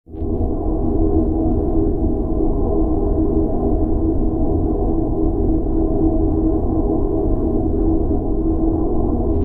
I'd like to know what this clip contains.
THis is an engine that hums in the distance